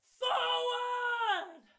Battle Cry 4
Microphone Used: SM58
DAW Used: Reaper
Objects Used: Simply Recorded a friend of mine shouting into the microphone, microphone used popshield and used limiter and compression to avoid peaks
Battle, Cry, english, Male, Scream, Shout, vocals, voice